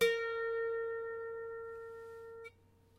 lap harp pluck